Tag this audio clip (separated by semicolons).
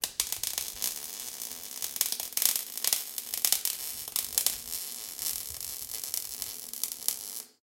sparks; noise; crackling